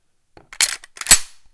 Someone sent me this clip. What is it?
De-cocking semi automatic shotgun quickly
Shotgun, Semi-Automatic